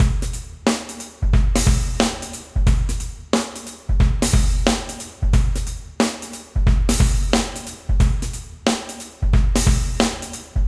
Funk Shuffle E
Funk Shuffle 90BPM
beat, drum, funk, shuffle, swing